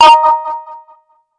Short modulated oscillations with extra modulation. A computer alerted to unknown operations.Created with a simple Nord Modular patch.
beep, bleep, blip, digital, effect, fm, modular, modulation, nord, robot, sound-design, synth, synthesis